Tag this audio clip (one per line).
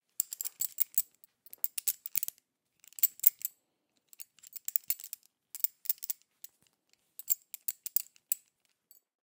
belt
clink
movement
jangle
clank
clothing
jingle
jingling
metal